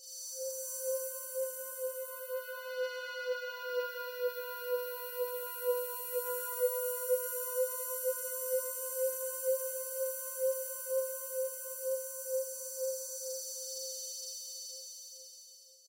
Luminize Moody high C BEND 3
drum and bass FX atmosphere dnb 170 BPM key C
170, atmosphere, bass, C, dnb, drum